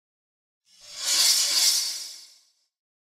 Weapon, Sword, Metal sword, Double swing, fast
Action, Sword, Weapon